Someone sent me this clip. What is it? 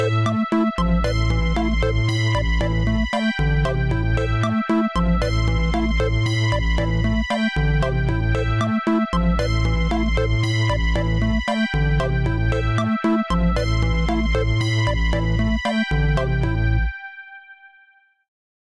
Tisserand-80thAsianDramatic
loop, movie, instrumental, ambience, jingle